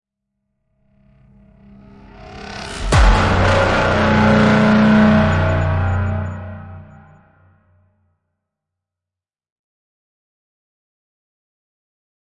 Cinematic impact. Dark, distorted, large, powerful.
Original samples made using an acoustic guitar as a resonator for an electric bass, and recorded using a Zoom H4n. Processing includes layering, reversing, amp simulation, distortion, delay and reverb.
Recorded for my personal A Sound A Day challenge (Asoada).